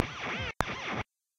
Alien Weapon 007
This sound was created by the same process as the other sounds in this pack (see descriptions).
noise, amp-VST, experimental, arifact, Revalver-III, virtual-amp, amplifier, glitch, amp-modelling